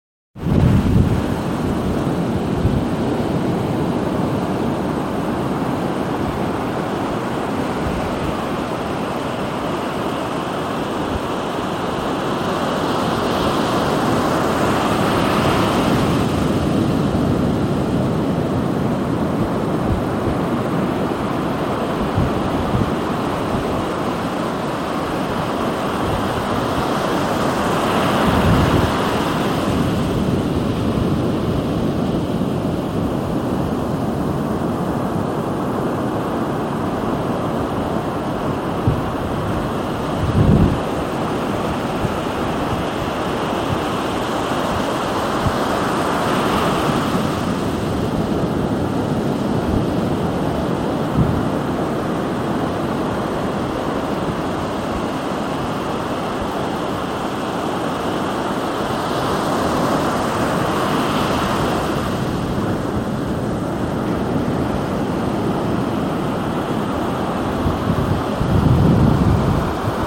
Waves Newport Beach CA 5 20 17-mc
Waves at Newport Beach, CA at night.
Newport, Waves